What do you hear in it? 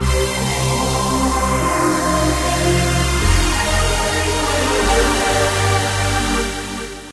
Riser 8 Flicker
Full strings. 150 bpm
flange, synth, trance